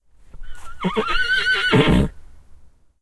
My horse Bandit Estel is calling for his friends.